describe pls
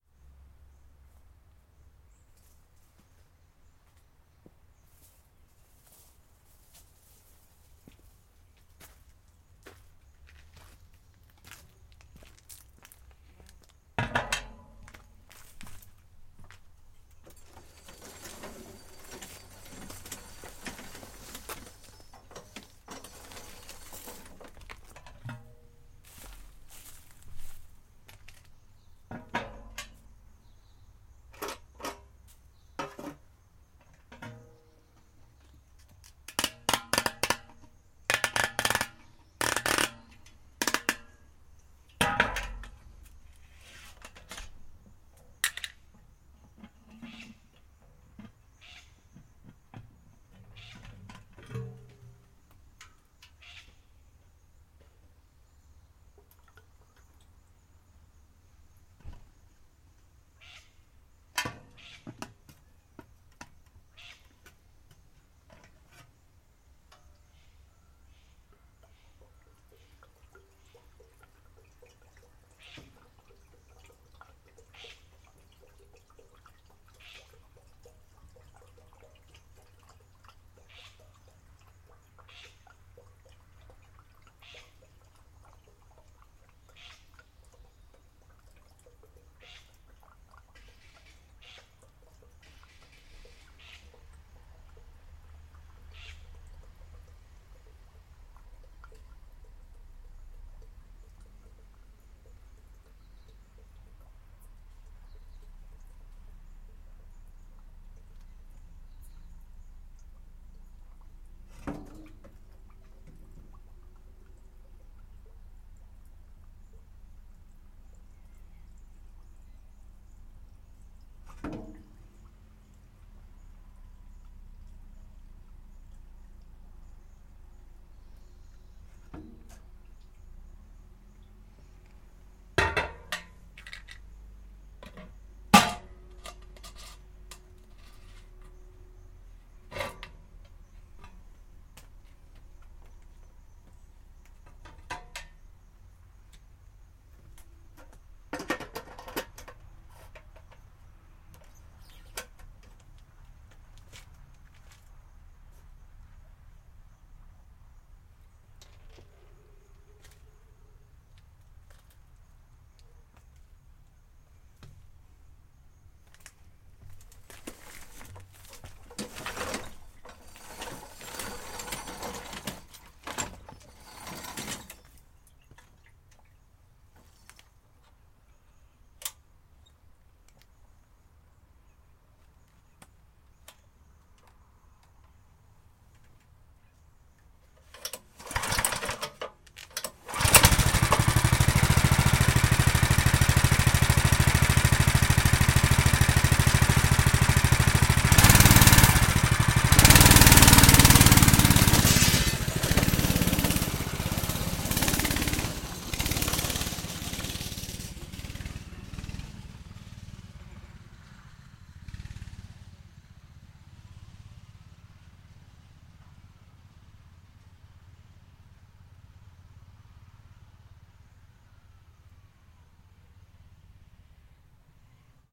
fill kart and go
I fill the tank of a Drift 2 go kart with a 6.5 HP motor in a shed, then drive off.
Theres an annoying bird that's always making noise outside the shed.
65, fill, go, HP, kart, petrol, tank